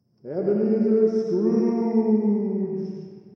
For the 2021 production of Christmas Carol I wanted to add some special effects. To create a ghostly voices saying ebenezer scrooge I recorded 10 different cast members, then I used audacity to add a little reverb and filter the voice using the frequency transform of a recording of wind. Then I played the voices while the wind was blowing.